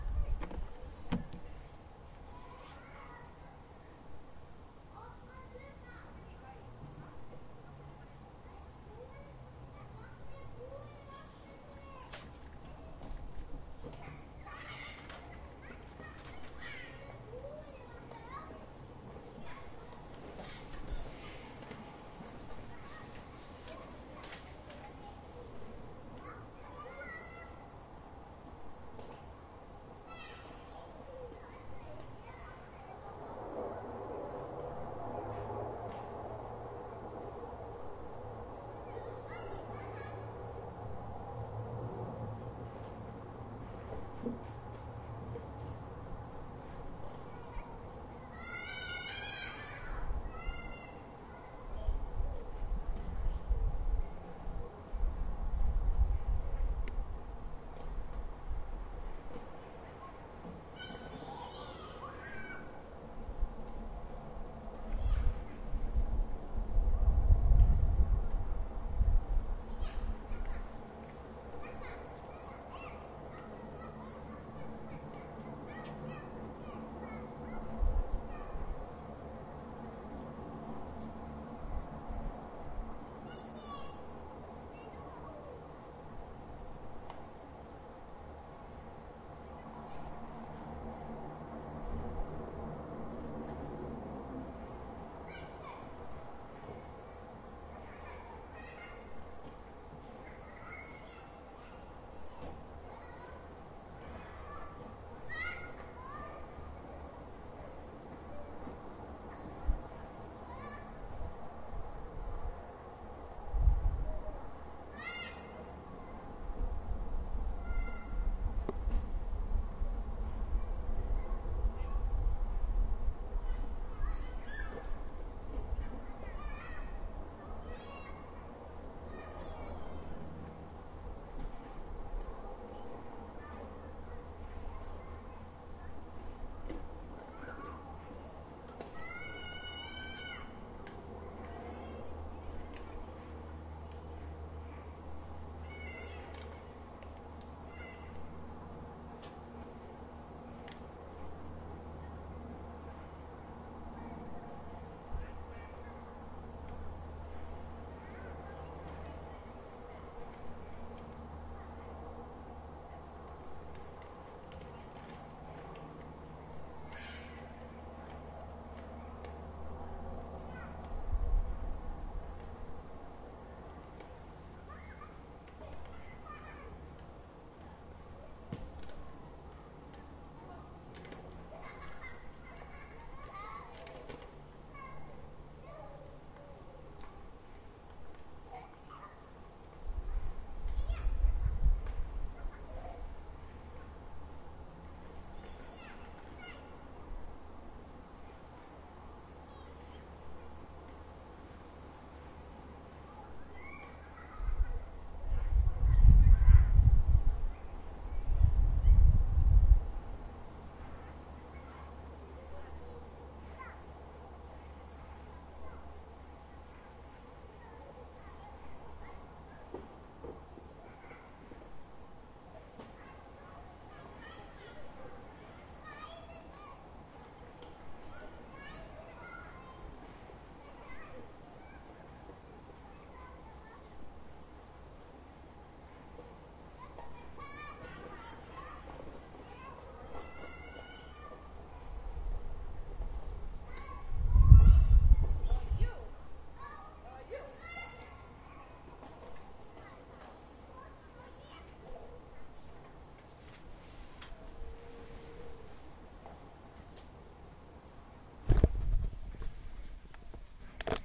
recording from my window in Reykjavik Iceland. Kids playing nearby, people chatting, slight wind mic noise.